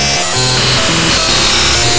Another weird electric noise.